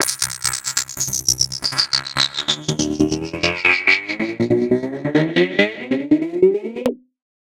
Pitch Rising 2
A pitch rising.
Rising, FX, Dance, Psytrance